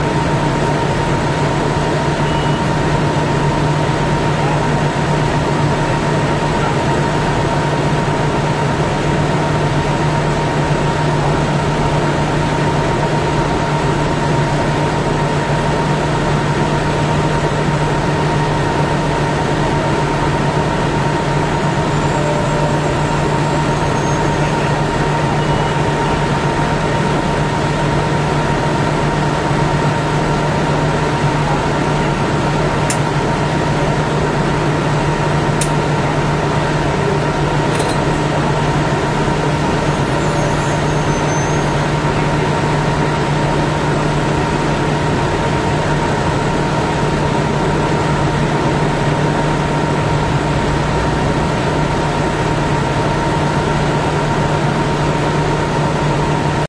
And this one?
Bus waiting at a stop in Gainesville, Florida, US. Recorded on a Sony Camera.